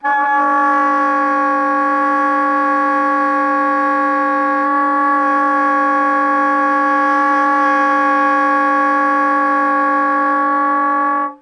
The number of file correspond to the numbering of the book:
Le sons multiples aux saxophones / Daniel Kientzy. - Paris : Editions Salabert,
[198?]. - (Salabert Enseignement : Nuovelles techniques instrumentales).
Setup: